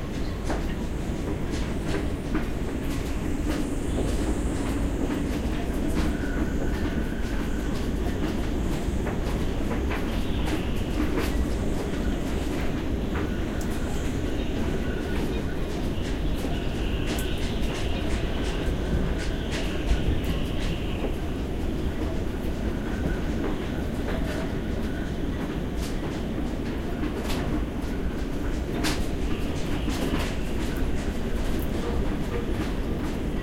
wind from under boat
This was recorded from under a moored sailboat at a marina in the winter. The wind was strong that night, rattling and shaking the rigging from off the lake.